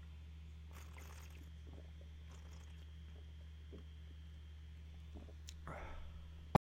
drinking beer bipdrinkin
drinking from a glass beer bottle
foley, mus152, drinking